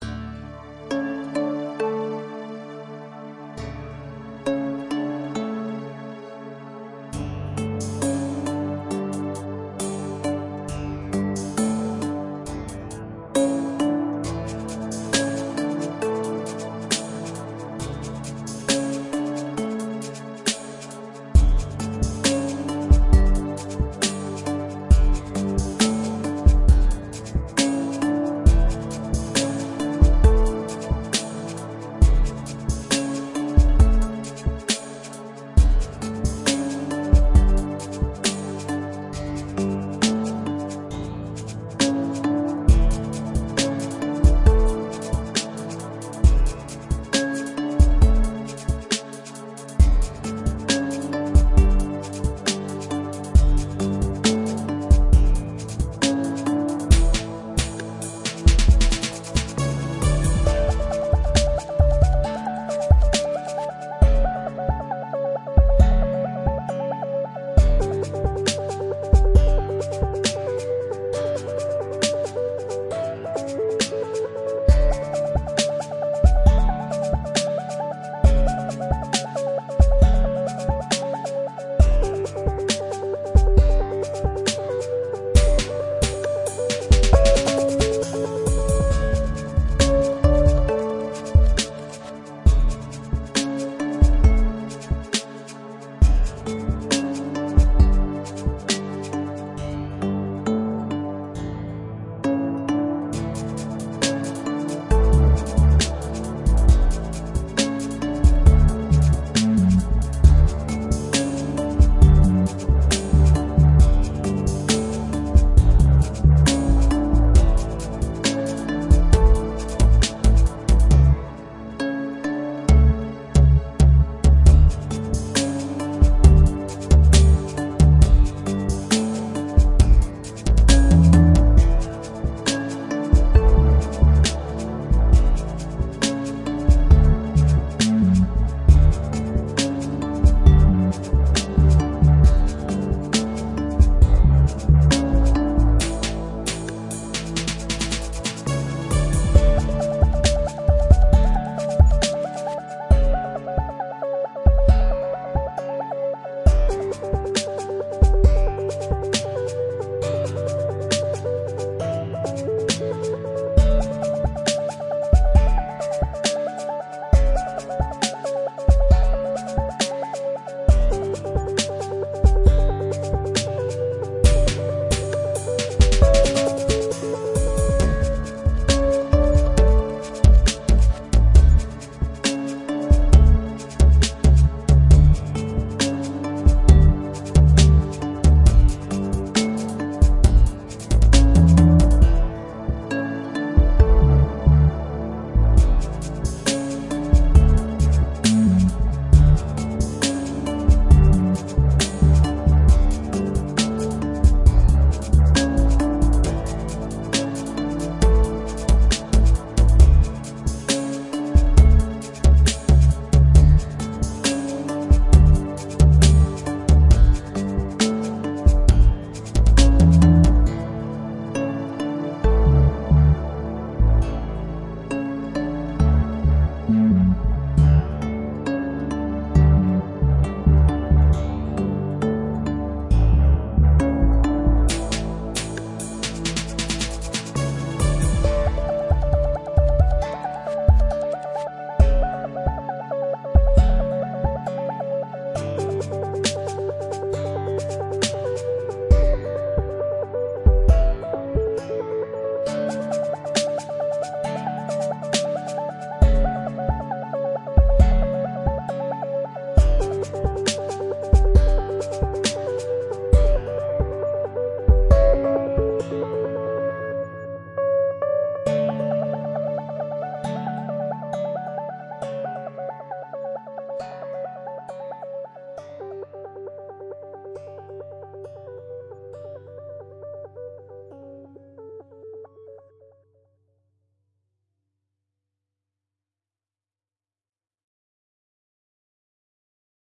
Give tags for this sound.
beat dj drum drum-kit drums electronic hip-hop loop peaceful percussion rap sad sample-pack scratch slow solumn track vinyl

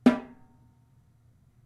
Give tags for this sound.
drum kit snare

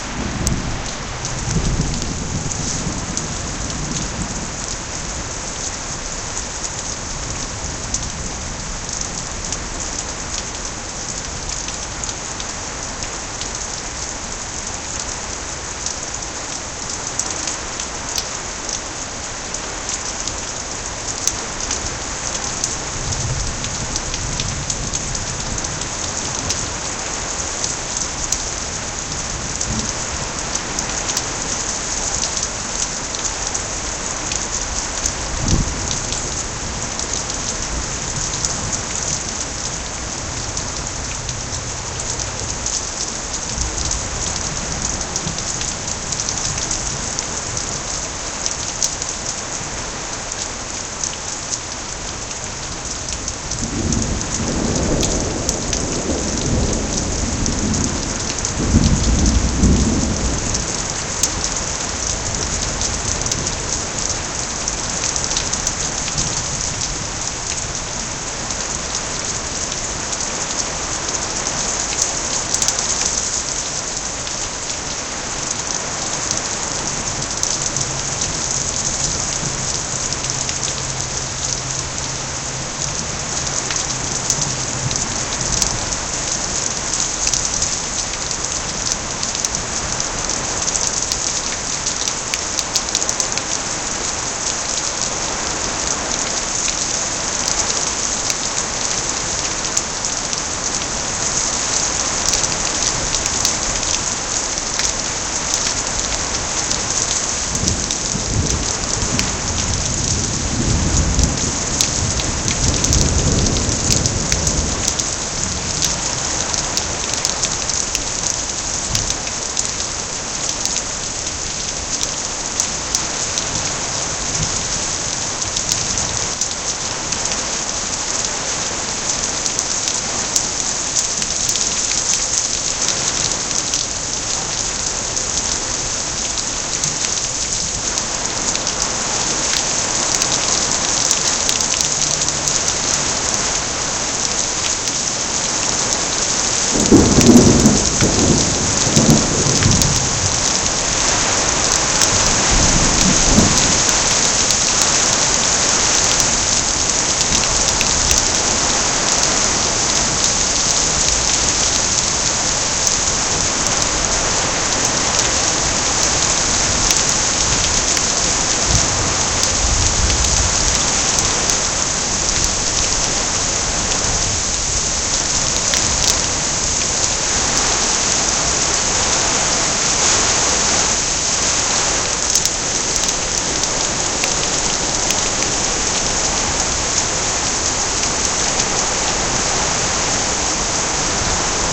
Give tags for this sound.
nature wind field-recording weather thunder-storm thunderstorm thunder rumble running-water storm hail rainstorm rain

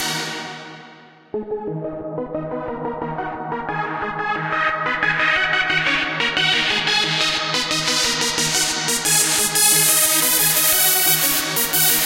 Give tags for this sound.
179BPM Bass Beat DnB Dream DrumAndBass DrumNBass Drums dvizion Fast Heavy Lead Loop Melodic Pad Rythem Synth Vocal Vocals

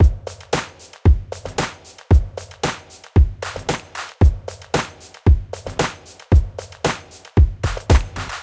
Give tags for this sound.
114 Dance Drum Hop Loop Pop

